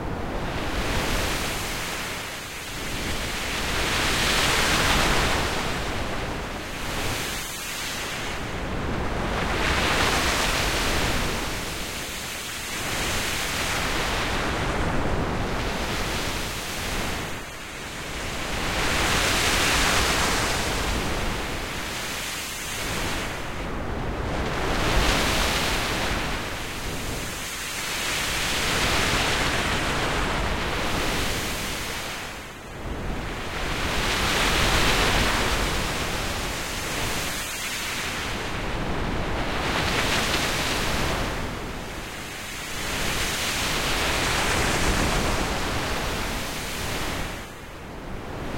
made in reaper with noise and filters mid side and phase quad etc...
encode, matrix, quadraphonic, synthetic-sea